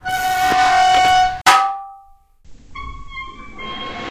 A rusty space hatch that closes. There were three sounds used to make this. Thanks for you sounds guys! Good night!